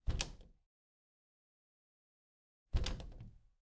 20 - Wooden door closing
CZ, Czech, Pansk